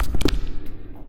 deconstruction-set turn-off
This is part of a sound set i've done in 2002 during a session testing Deconstructor from Tobybear, the basic version
was a simple drum-loop, sliced and processed with pitchshifting, panning, tremolo, delay, reverb, vocoder.. and all those cool onboard fx
Tweaking here and there the original sound was completely mangled..
i saved the work in 2 folders: 'deconstruction-set' contain the longer slices (meant to be used with a sampler), 'deconstruction-kit' collects the smallest slices (to be used in a drum machine)